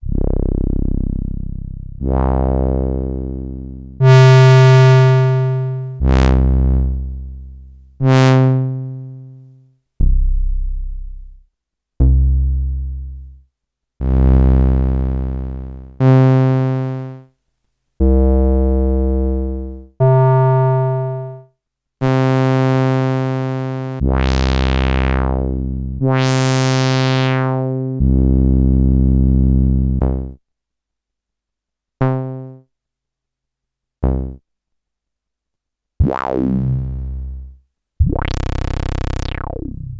SAMPLE CHAIN for octatrack